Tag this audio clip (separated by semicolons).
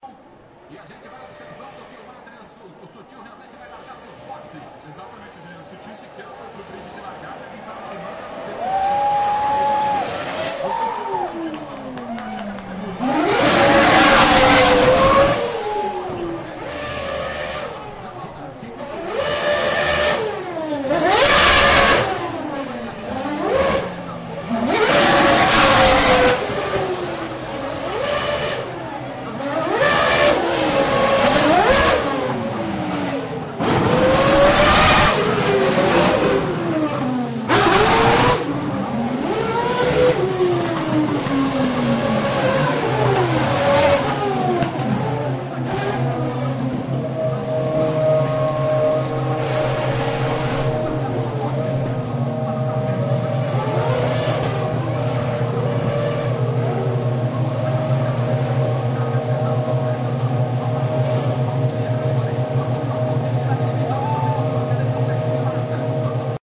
car; h4; field-recording; f; zoom; revving; horsepower; formula-one; pulse-rate; vroom; howl; fast; racing; rapture; 1; formula-1; engine; accelerating; f1; battle; exciting; sound; noise